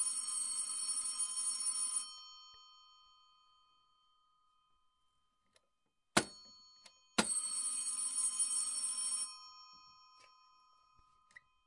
Omas Telefon 01
Recording of an old telephone I found at my grandmothers house. Its from about 1920-1930 and was recorded with a Tascam DR-40.